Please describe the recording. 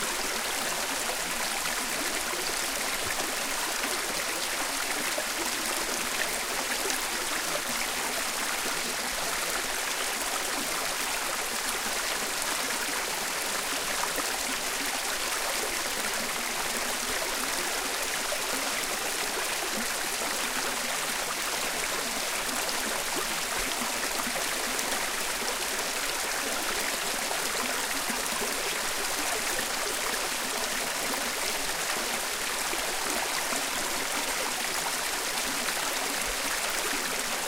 Thailand Phangan Jungle Waterfall 2
A small stream of water deep in the thailand jungle on Phangan island
island, river, field-recording, forest